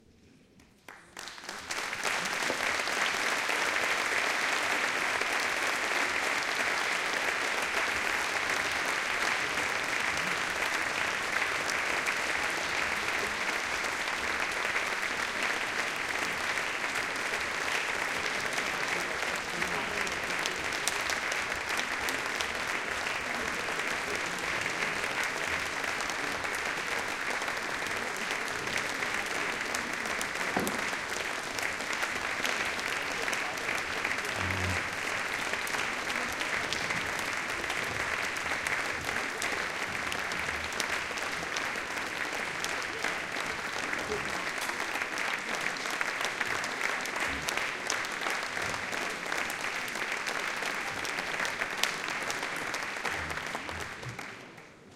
Recorded on a concert i recorded.
Equipment used:
2x AGK C1000S
Focusrite Scarlett 2i4
Ableton Live 9